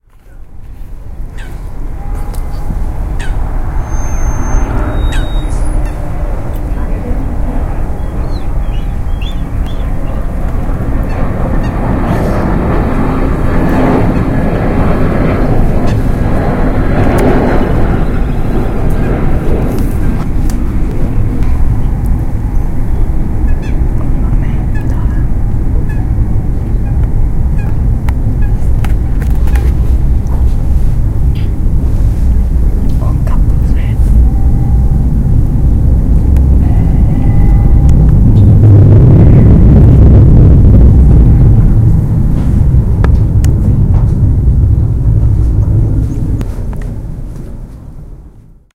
so delta
Sound recorded in the framework of the workshops "El Delta del Llobregat sona" Phonos - Ajuntament del Prat - Espais Naturals Delta.
Aquesta es una altra gravació de les diferents aus que es poden trobar al delta del riu Llobregat.
airplane, birds, Deltasona, river, wind